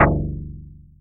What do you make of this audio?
Plucked
Guitar
Single-Note
Guitar, Plucked, Single-Note